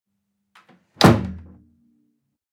Door slamming hard
Slam the Door!